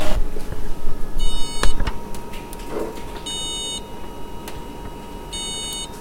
beep industrial machine
machine, mechanical, robot